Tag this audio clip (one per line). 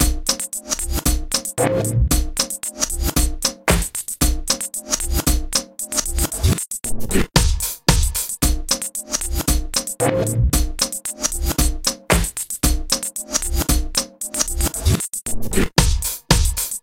sampling
rhythm
pads
drum-loop
loop
beat
percussion-loop